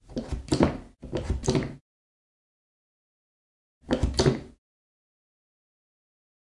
13 - Soap, squeeze
Soup sqeeze. (more versions)
bath, cz, panska, shower, soap